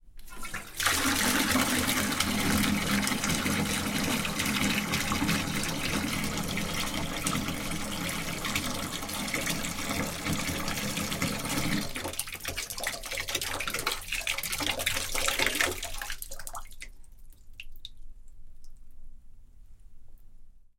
pouring water into the bath (bath filled already)
Precondition: in the bath a bit of water. Open water tap, pouring, close water tap, residual water leaving the water tap, water drops.
Mic: Pro Audio VT-7
ADC: M-Audio Fast Track Ultra 8R
bath, water, pouring